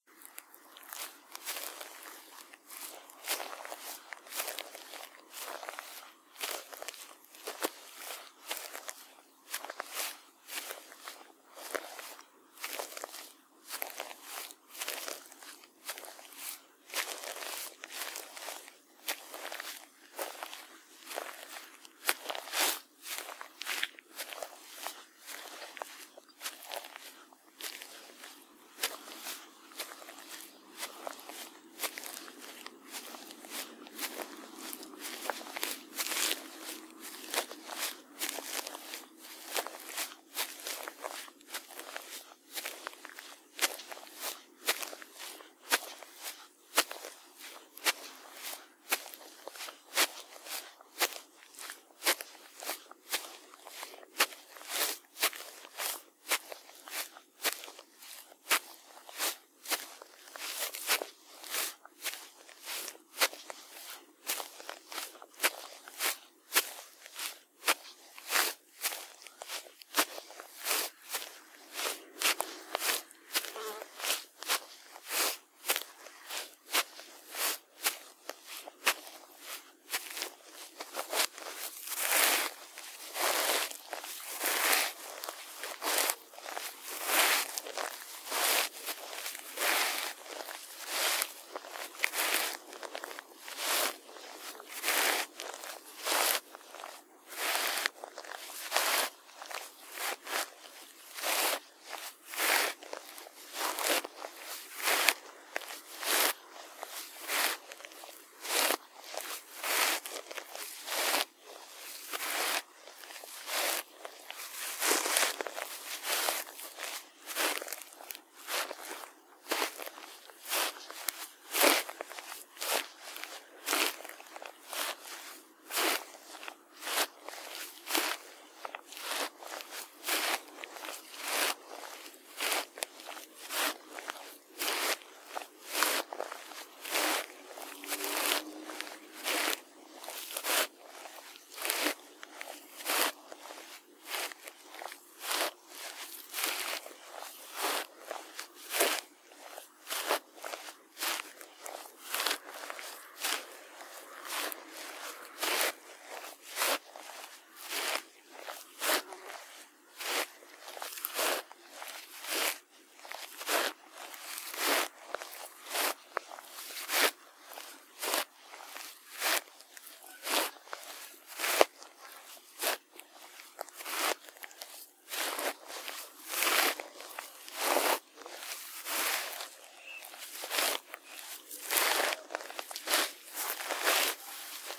Grass Steps
Recording of steps on grass
meadow, walk, going, walking, grass, Steps